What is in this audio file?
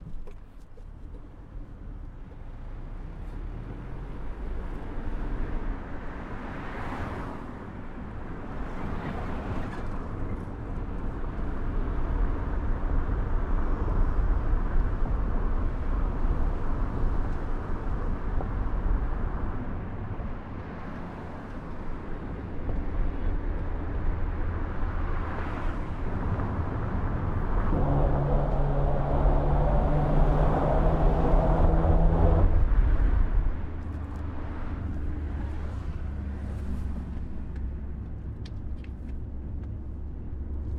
the hum of vehicles crossing a grated steel bridge deck
The bridge is the Johnson St. Bridge in Victoria, BC, Canada, which is currently being prepped to be replaced.